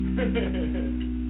Silly Laugh
The laugh of a friend, while playing in a band.
Recorded with a Audiola SDA 8271N, September 2014, Italy. I changed the SR with Audacity (original SR 8000).
funny, laughter, man